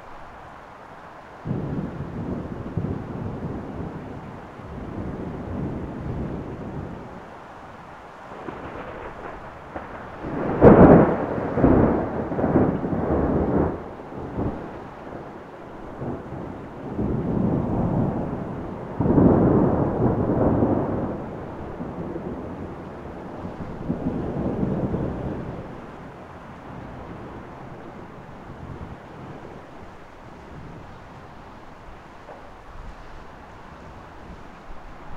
cool thunder clap
A single thunder clap and rain with slight distortion and just over peak level. Pretty cool. - Recorded with a high quality mic direct to computer.